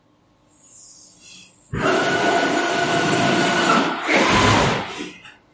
Laser Machine Move Towards and Shift
Rev, Mechanical, Factory, Machinery, machine, electric, medium, Industrial, Buzz, motor, high, low, engine